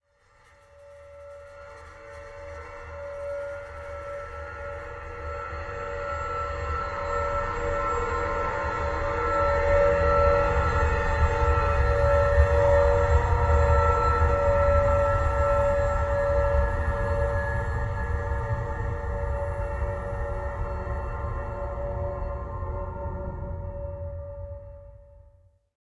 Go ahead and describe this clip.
industrial devolution 1
again more fun with convolution by combining several sounds together to make a weird smear
ambient, atmospheric, drone, experimental, industrial, smear, space, wash, weird